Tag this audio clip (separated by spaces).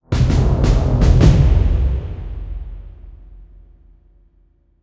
death sfx rpg video-game horror games gaming lost lose videogames fail gamedev terrifying game fear frightful jingle indiegamedev epic indiedev frightening scary gamedeveloping fantasy